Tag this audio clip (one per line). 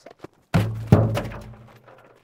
metallic
barrel
oil-barrel
metal
clang